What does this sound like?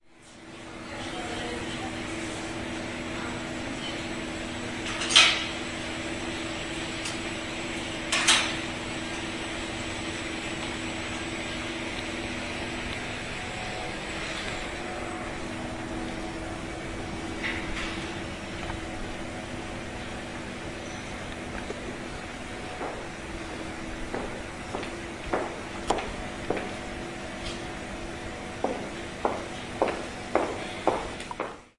WSNSiD 3floor 211010
21.10.10: about 16.30. the 3 floor in WSNHiD (School of Humanities and Journalism). the vice-chancellor floor. general ambience: steps, high heels, voices, echo, buzzing of a lift and drinks machines.
corridor, echo, field-recirding, highheels, poland, poznan, university